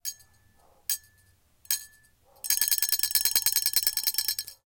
Maquina tragamonedas
Sound of a slotmachine when someone win.
coins, winner